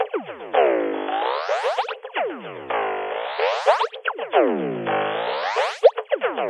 Indigenous-3 LOOP
This is an experimental loop from the production of song recording "Indigenous Creature" and remains unused. It originates from our own playing of hand drum then highly FX'd.
Hearing is seeing
drums, Rhythm, loop, electronic